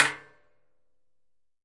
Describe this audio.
hit - metallic - trailer - metal fender 02
Hitting a trailer's metal fender with a wooden rod.
bang, clang, fender, hit, impact, metal, metallic, percussive, strike, trailer, wood, wooden